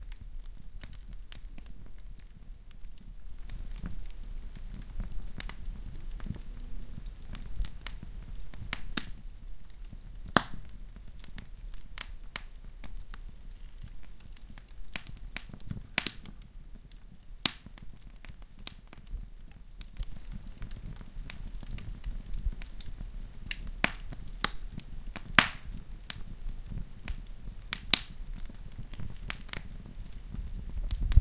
Fire Crackle and Flames 001
Crackling log fire with dancing flames. Occasional popping from logs.
burning, Fire, flames, log, popping